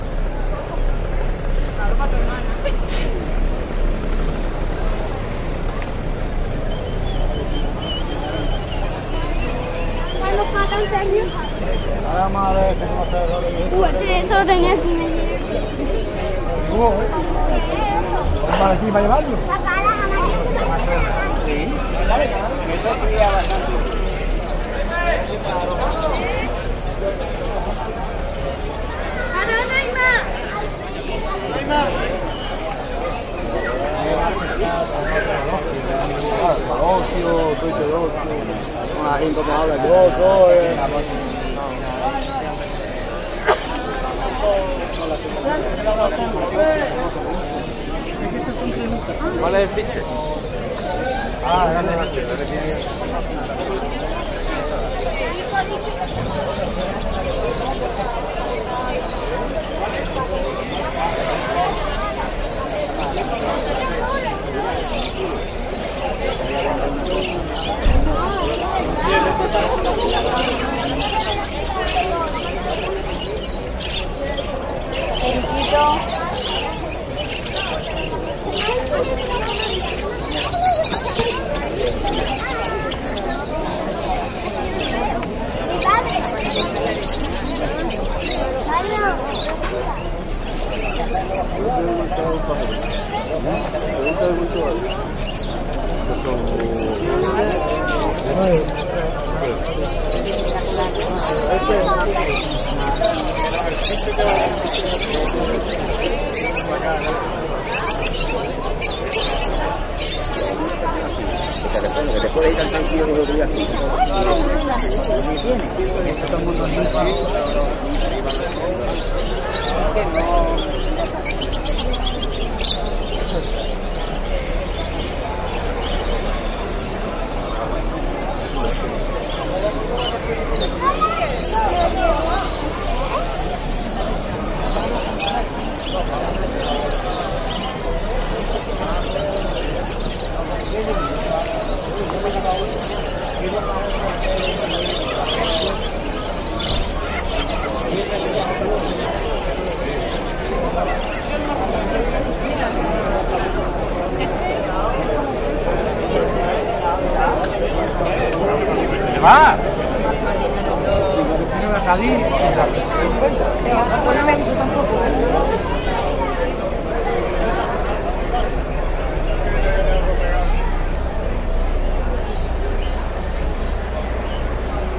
ambient noises in the traditional (and now extinct) Sunday flea market of pets named 'La Alfalfa'. Low fidelity / Ambiente en el mercado callejero de animales llamado La Alfalfa, que se celebraba los domingos en Sevilla. Baja fidelidad